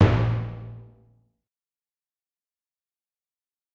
A clean HQ Timpani with nothing special. Not tuned. Have fun!!
No. 6